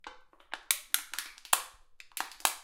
Beer Can Crush 04
Beer can being crushed. Recorded on a Zoom H4N using the internal mics.
beer, crush, metal, tin, can, aluminium